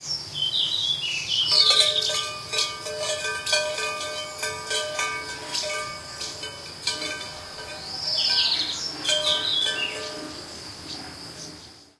Cowbell, crickets, and birds calling. Matched Stereo Pair (Clippy XLR, by FEL Communications Ltd) into Sound Devices Mixpre-3. Recorded at Mudá (Palencia province, north Spain).